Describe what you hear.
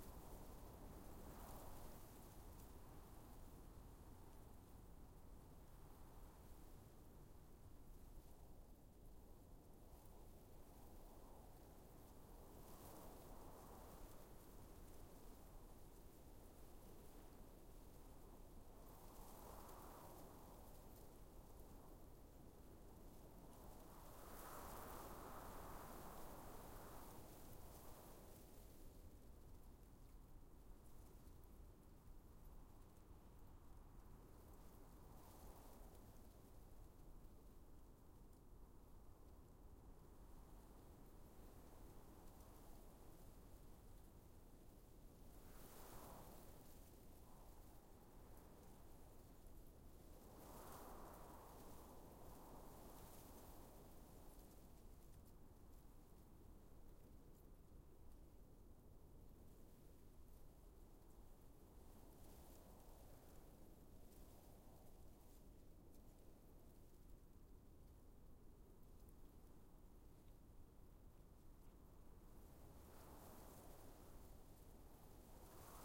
wind light breeze whips through grass aspen sparse corn gusts rustle
breeze, corn, light, rustle